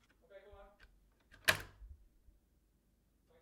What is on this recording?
A knob or switch